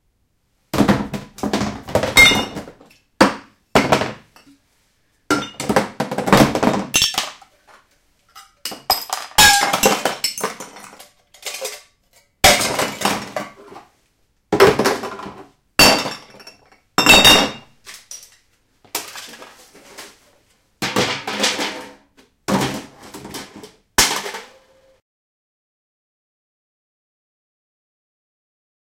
Tins, bottles, containers being thrown into plastic box